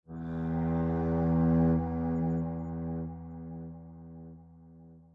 PsyE2lowscifi
Sci-Fi sound in a low tone.
dark, atmosphere, cinematic, drone, sci-fi, ambience